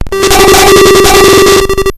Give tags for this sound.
than; bent; 505; oneshot; a; hits; beatz; distorted; higher; glitch; drums; circuit; hammertone

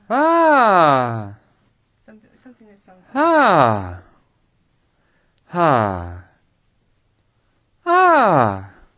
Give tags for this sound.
ah; ahh; ahhh; male; man

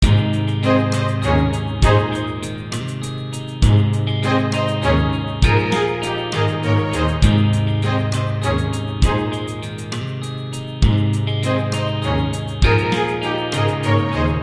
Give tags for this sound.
zen; ambience; harmony; uplifting; nature

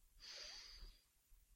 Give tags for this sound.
foley; nose; smell; sniff; sniffing

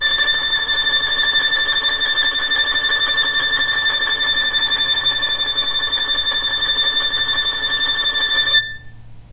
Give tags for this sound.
tremolo; violin

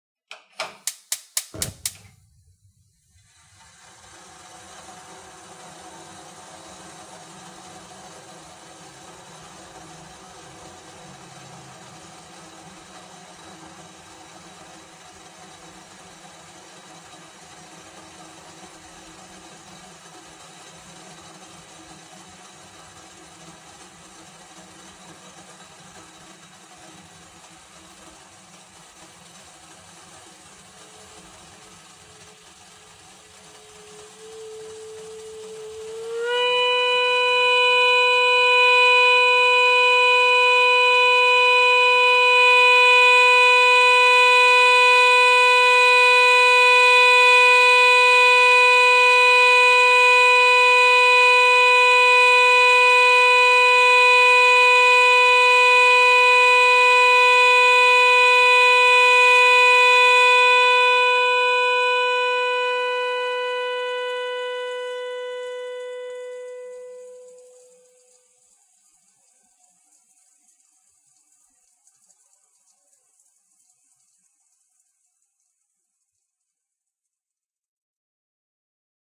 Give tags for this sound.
steam; tea; kettle; whistle; boil; teapot

